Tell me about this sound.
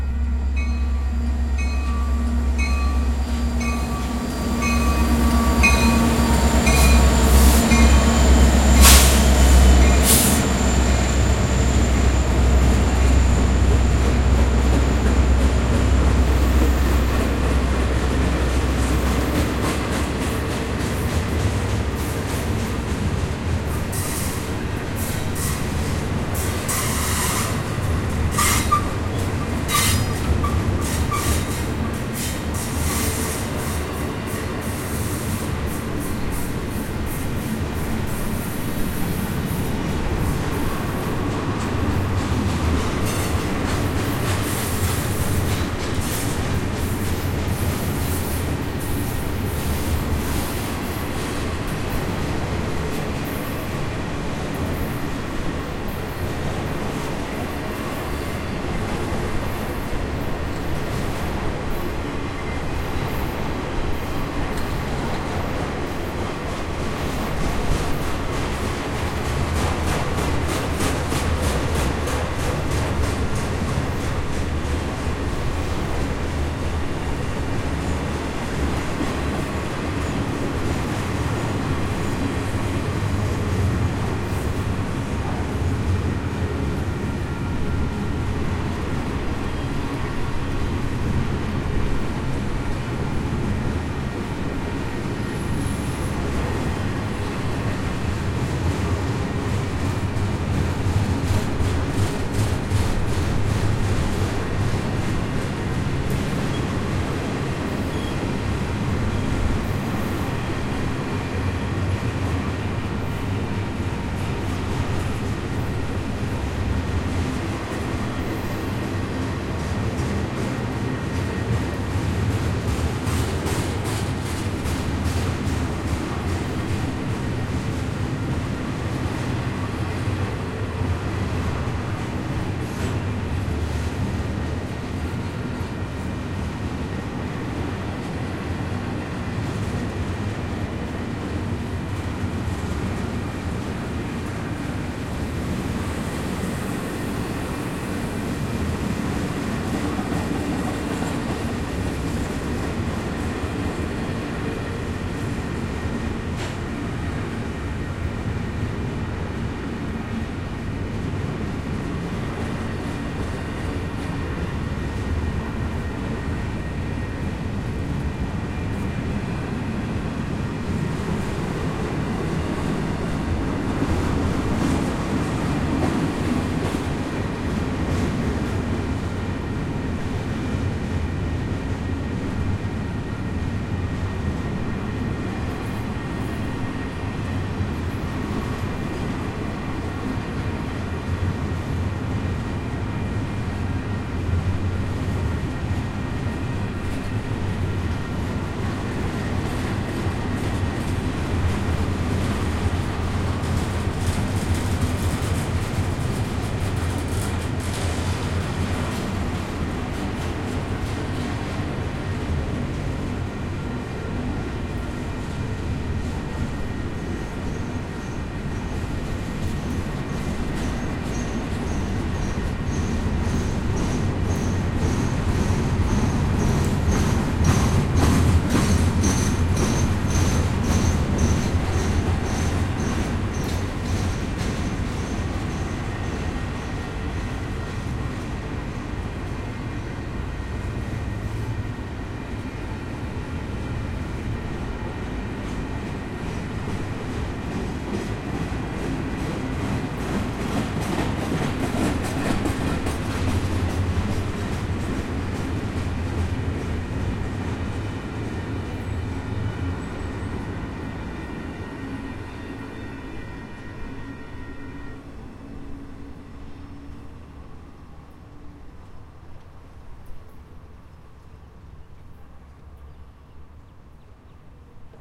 train going by on tracks about 10 feet away
field-recording, train, railway